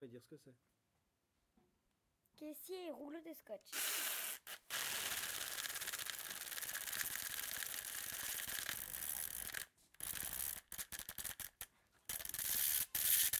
Sounds from objects that are beloved to the participant pupils at La Roche des Grées school, Messac. The source of the sounds has to be guessed.
France, mysounds, messac